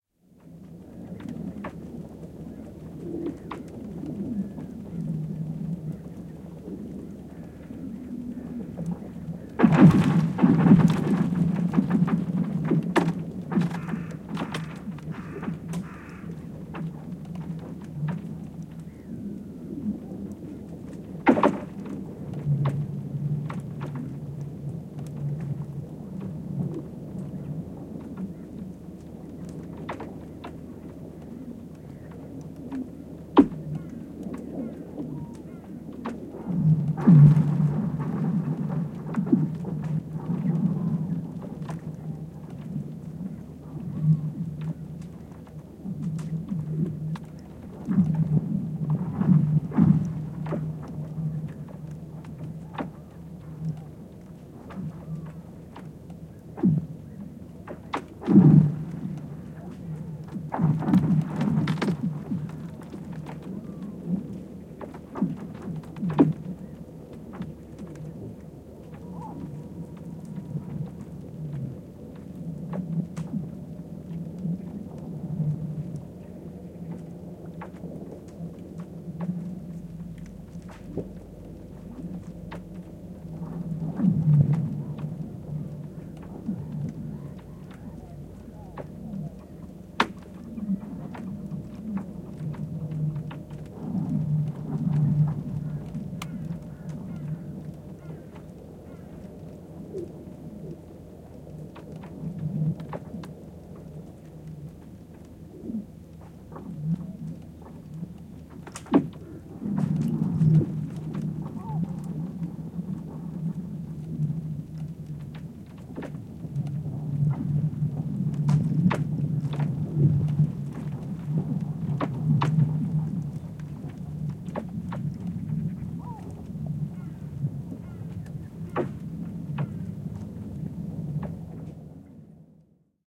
Jää ritisee, kumahtelee, vonkuu, räsähtelee ja paukkuu jäidenlähdön aikaan. Taustalla joitain lintuja.
Paikka/Place: Suomi / Finland / Vihti
Aika/Date: 05.04.1989